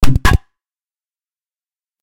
Reinforcing Membrane Clicks More Reinforcing II
UI sound effect. On an ongoing basis more will be added here
And I'll batch upload here every so often.
Clicks, II, Membrane, More, Reinforcing, SFX, Third-Octave, UI